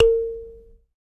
SanzAnais 70 A#3 -doux
a sanza (or kalimba) multisampled
african, kalimba, percussion, sanza